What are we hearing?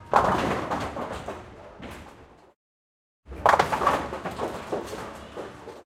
Bowling: Here we have the sounds of a bowling ball hitting the pins in a bowling alley. Not only do you hear the loud crash when contact is being made by the ball but you also hear the pins scatter. There are different variations of the ball hitting the pins to accommodate the user's preferences. They vary in tempo and amplitude. Recorded with the Zoom H6, Rode NTG. Great to add some fun to a soundscape.